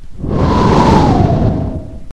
Incoming mortar 3
Sound of an incoming mortar round